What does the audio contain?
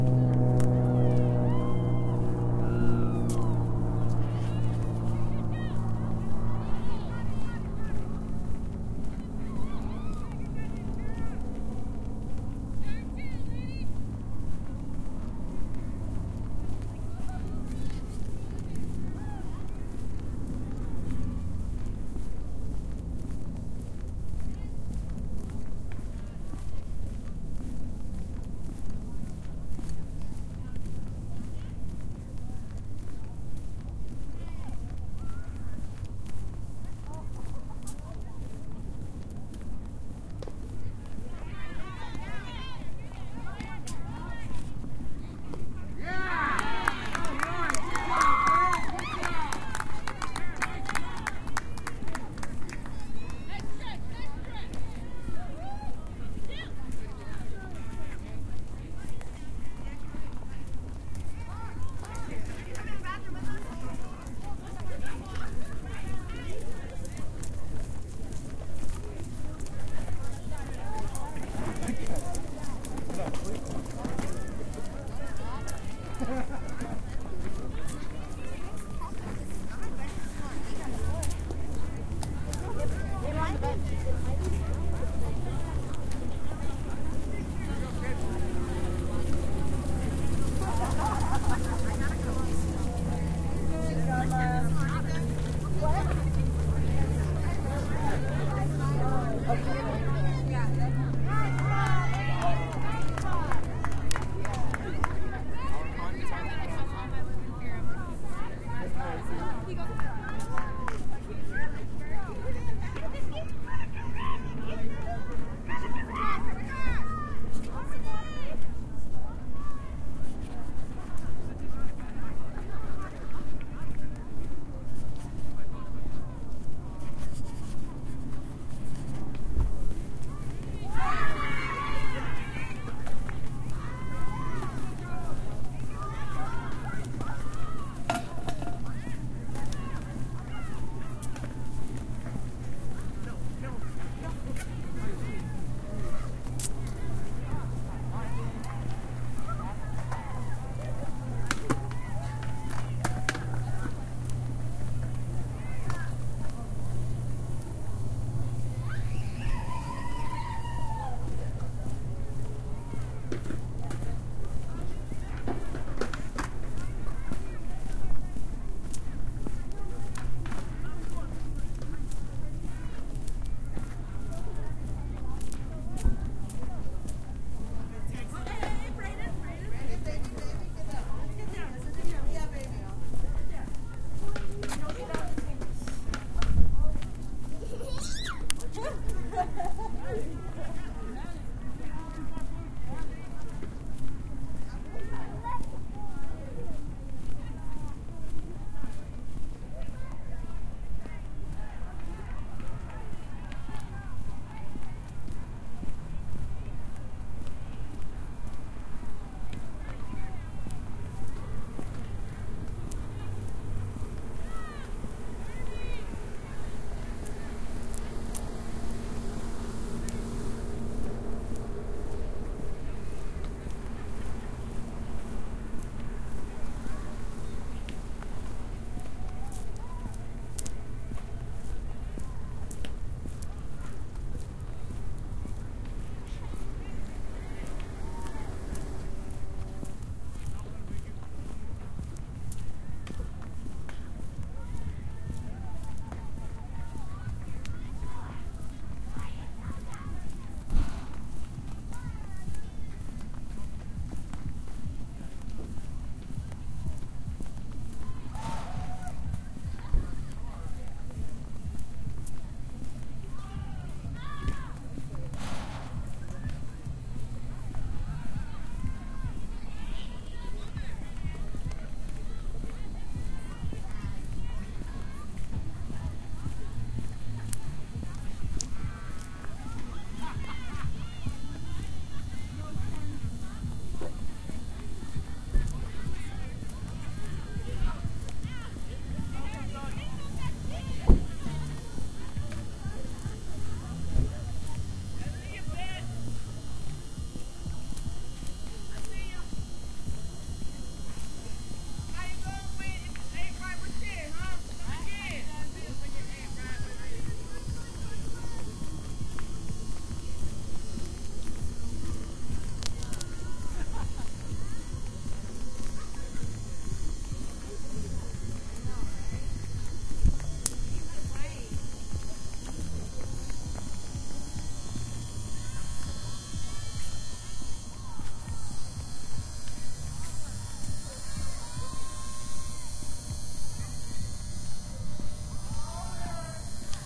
Softball Park day
Recorded 18 January, 2020 in Las Vegas, NV at baseball parks. Recorded with a Tascam DR-05X record; no adjustments have been made. The recorder was handheld as I walked along the various baseball fields. You'll hear my foot steps.
aircraft, cheering, crowds, music, softball